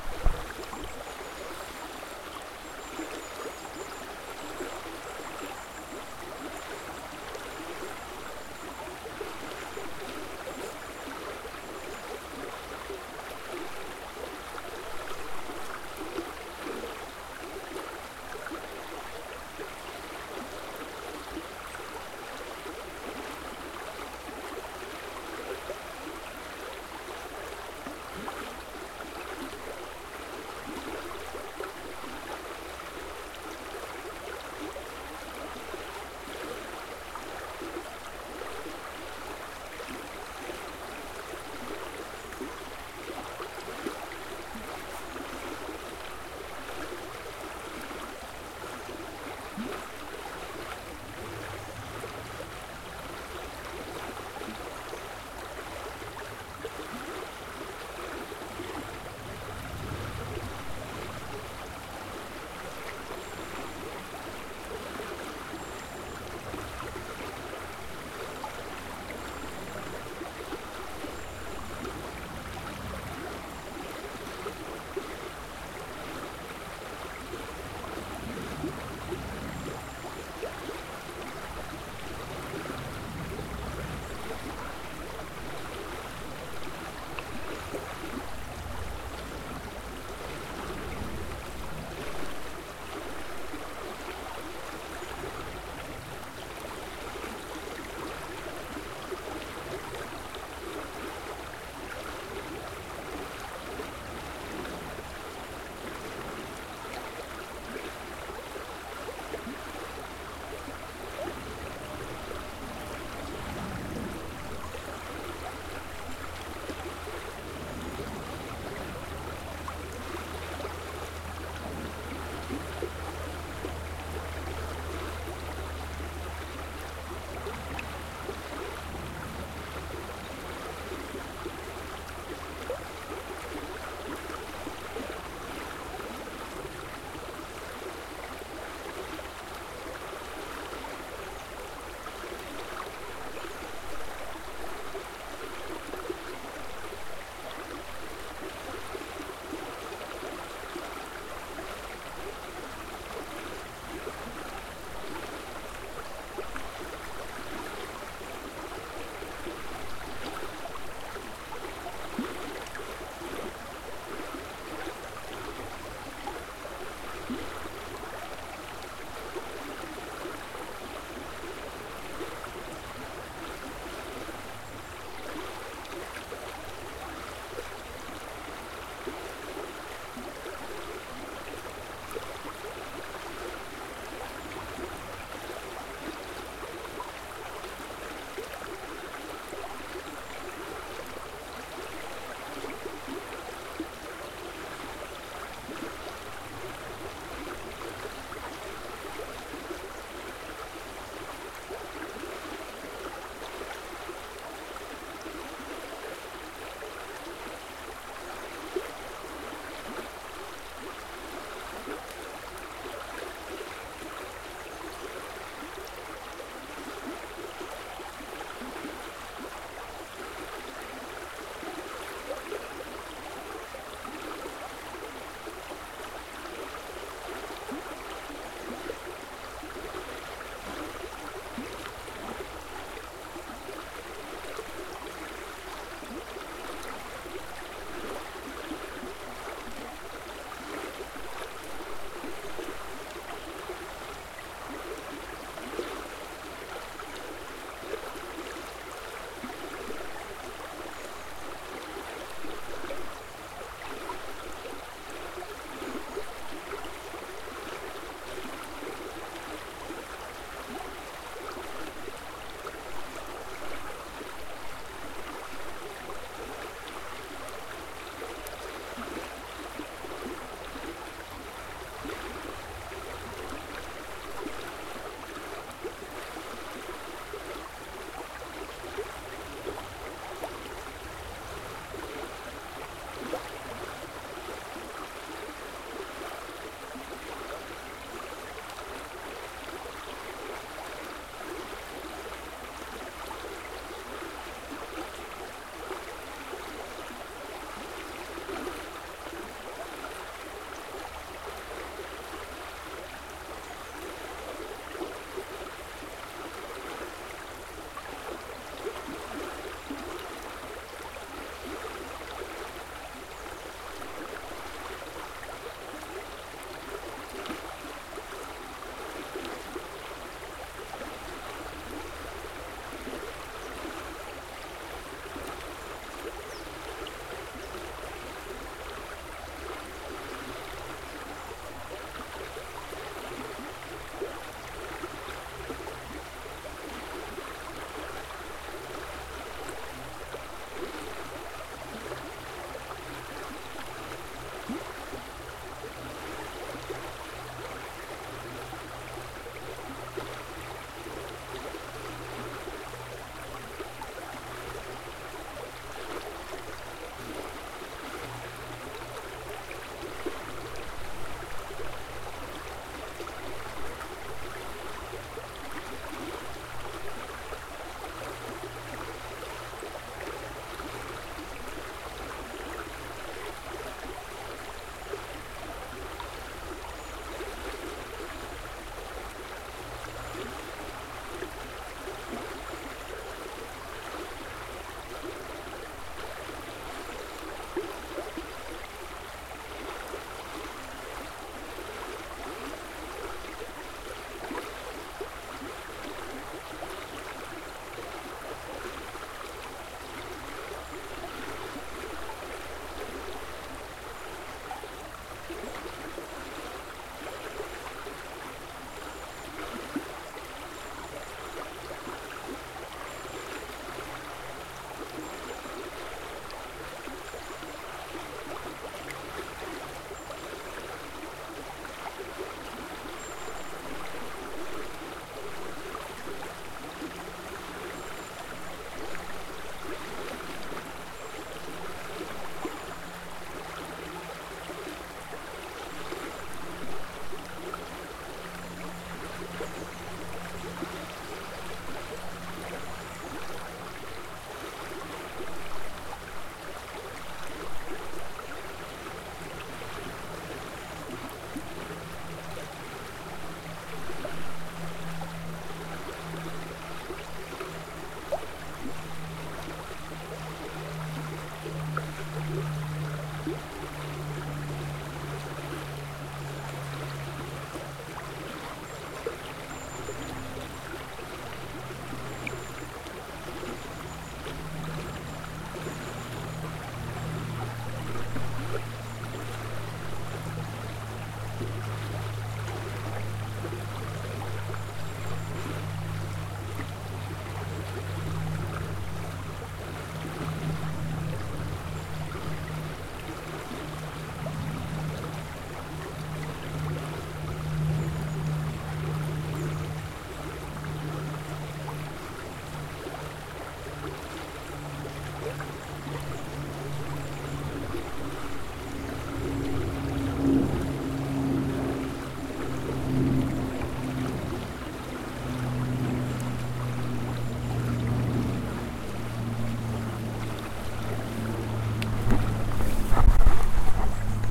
Wildtrack audio from a Tascam DR-22 WL field recorder. Recorded near Burford, Oxfordshire, with some slight road noise in the far distance. Stereo recording with birdsong and gurgling river, the Tascam was placed at the edge of the river on a grassy slope.